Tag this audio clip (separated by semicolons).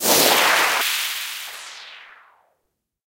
atmospheric; odd; strange; weird; sci-fi; effect; sample; fx; space; Yamaha-RM1x; noise